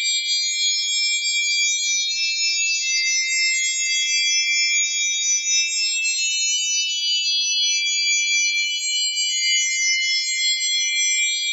glass chimes loop
Loopable piece of bowed glass sounds pitched C D E F# G# A# (6-TET scale).
chimes, bowed-glass, magic, fairy, singing-glass, loop, dream